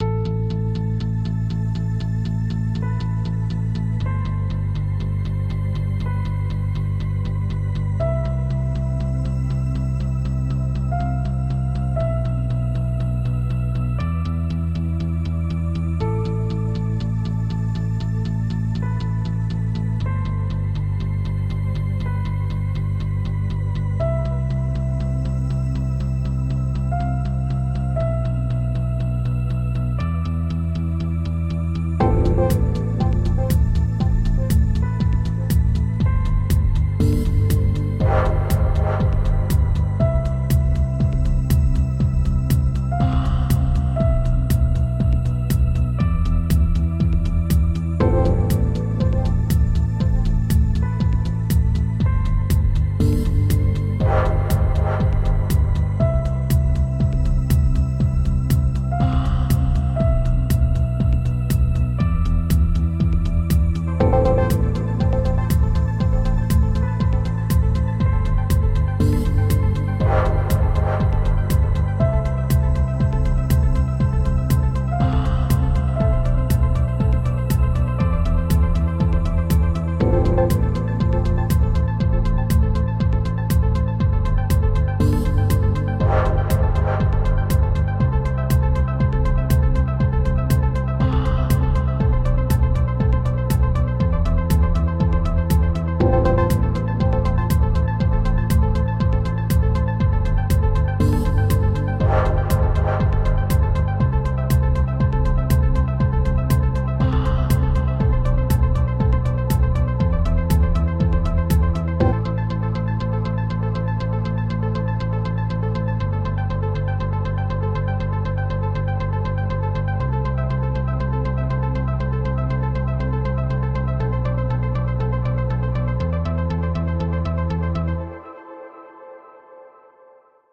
Techno dark pop minitrack
Technodarkpop_minitrack.
synths: Ableton live,komtakt,Reason
Trance, dark, Snare, Bass, synths, piano, effect, music, pop, Drums, Loop, Electro, Drum, Techno, originalcomp, Dance, FX, Kick, sounds, frankun, House, track, Clap